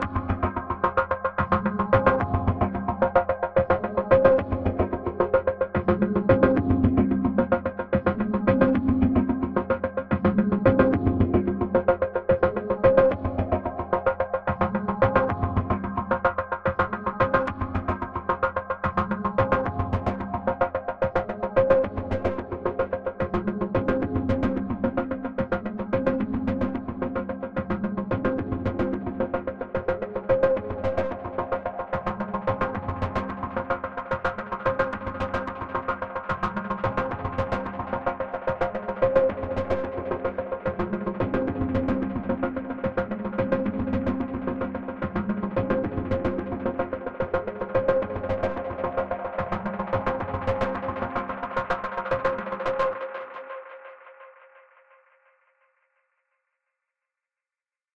Synth Loop 36 4 - (110 BPM)
This sound or sounds was created through the help of VST's, time shifting, parametric EQ, cutting, sampling, layering and many other methods of sound manipulation.
dub, electro, production, cool, Loop, sample, samples, music, smp, studio, dubstep, Synth, bass, fl